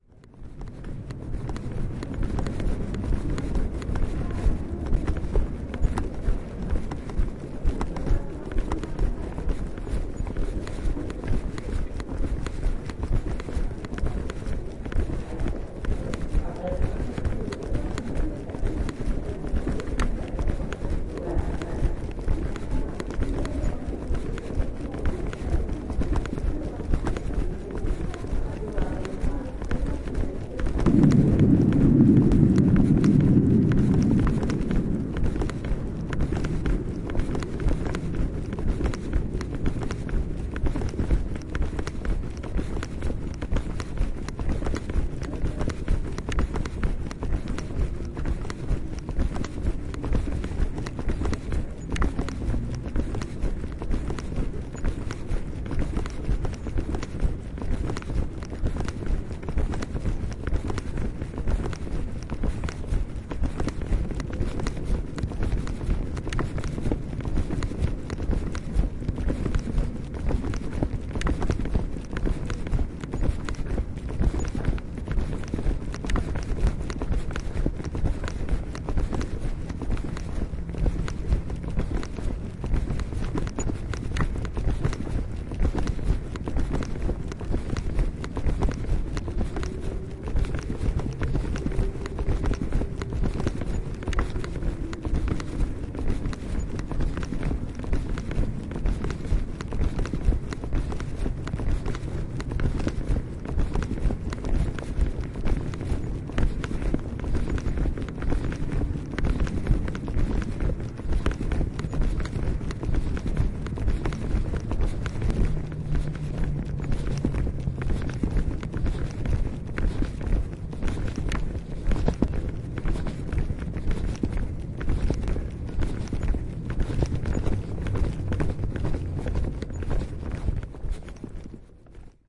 handbag soundscape 210411
20.04.2011: about 19.40. between Niepoldeglosci and Rozana streets. accidental recording. I forgot turn my recorder off and I had put it in my handbag. so this recording is my handbag soundscape.
beat, handbag, field-recording